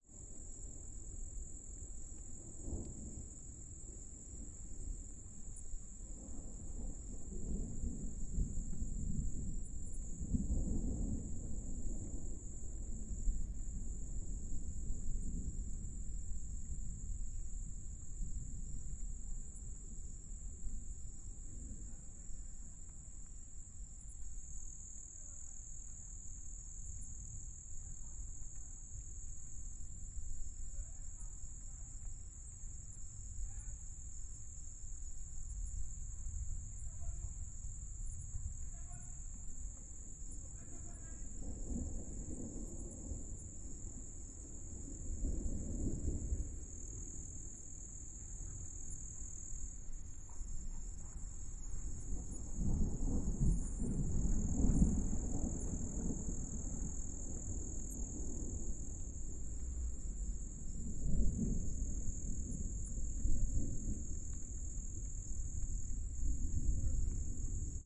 AMB Ghana Thunder, Crickets, Vox LB
Lite Thunder storm with crickets near Biakpa, Ghana
Africa, Ghana, Thunder, Crickets